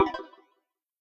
Lighthearted Death sound for casual -